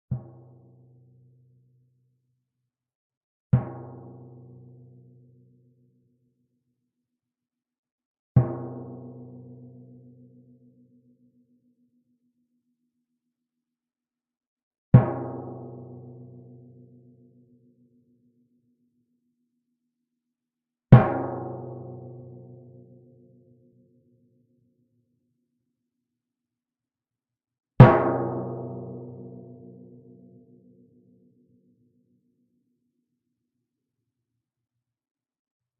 timpano, 64 cm diameter, tuned approximately to B.
played with a yarn mallet, about 1/4 of the distance from the center to the edge of the drum head (nearer the center).